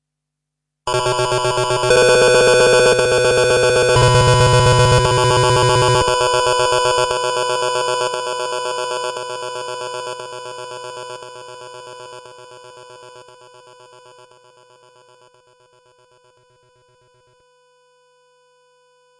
Breathing Industrial Game: Different breathing with some distortion. Sampled into Ableton using distortions like Trash2, compression using PSP Compressor2. Recorded using a SM58 mic into UA-25EX. Crazy sounds is what I do.